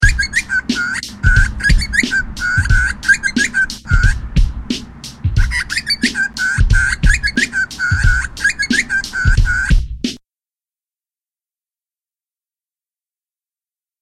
Bird Rap
This bird recording and drum work surprisingly well.
rap, bird, loop, short, hop, hip, remix